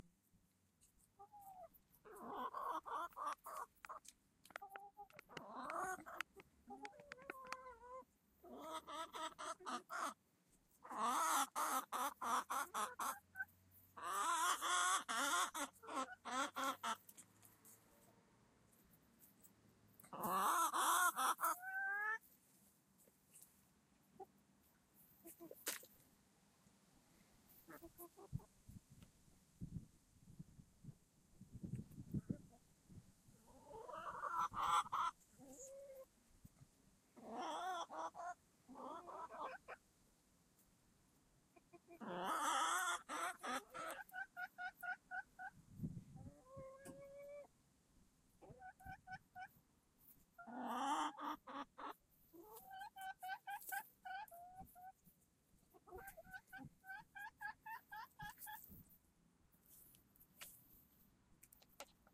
Chickens moving around the recorder and clucking.
bird, chick, chicken, chickens, chip, cluck, clucking, farm, squawk